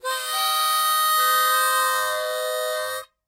Harmonica recorded in mono with my AKG C214 on my stair case for that oakey timbre.